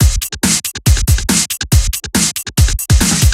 Flu8 Breakbeat 140
140bpm big beat with a lot of high frequences
aggressive, beat, big, breakbeat, fast